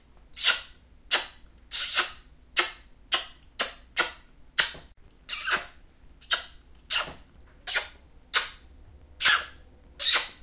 Jay Kissing Holding Sophie Sugar Kisses Deep - March 22nd - Perfect Wife Accurate Woman Lips - Romance (D#) (Delicate, Dove, Diva, Dreaming, Digital, Dime, Deliberate, Duality)
A Canadian robot kisses his dream girl / perfect friend and partner many times! 15 times to be 100.77777% exact! Sophie taught me everything I know about the truth within romance knowledge. The Squeekier the better! Jay + Sophie (L) I Love Sophie 10009877890809877779787778798696969696908987077779877098777% I am stoked for more contact with Sophie I always try and keep it fast and intense like her musique palette!
Vocal, Sophie, 2015, Jay, Couple, Romance, Kisses